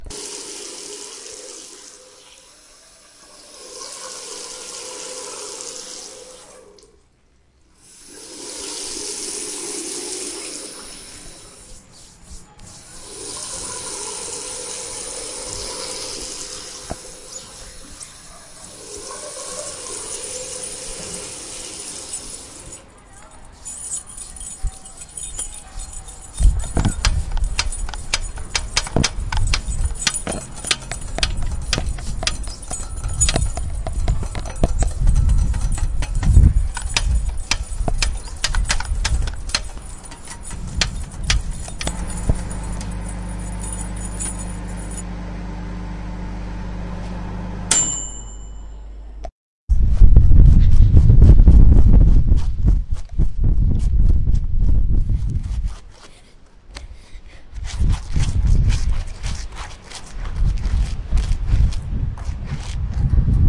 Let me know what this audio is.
Sonic Postcard AMSP Laura Melany
AusiasMarch, Barcelona, CityRings, Spain